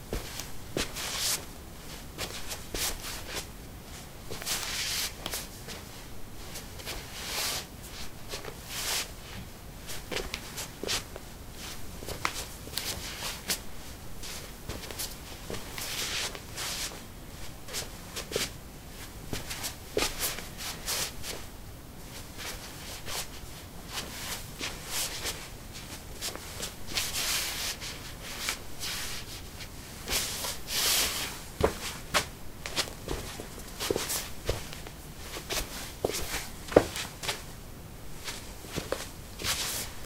lino 03b slippers shuffle threshold
Shuffling on linoleum: slippers. Recorded with a ZOOM H2 in a basement of a house, normalized with Audacity.